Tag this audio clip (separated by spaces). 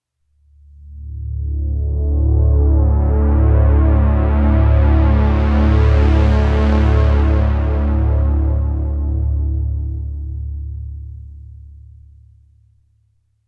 Juno-60 Synth Effect Pulse